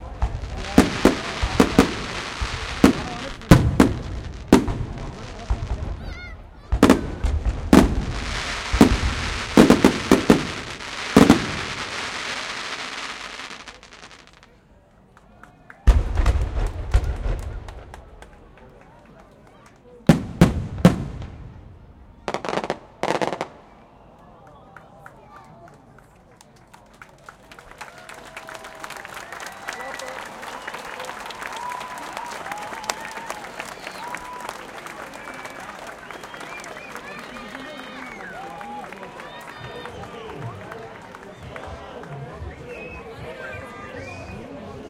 The end of the annual fireworks at the start of the fair in September in Hasselt, Belgium. This is the only part of my recording in which the noise called music didn't destroy the beautiful sound of the exploding stuff in the air. When it's finished, people start applauding. The recording was made with a Marantz PMD670 and an Audio Technica AT825, standing in the crowd at a few hundred metres from the bridge from where the fireworks where fired.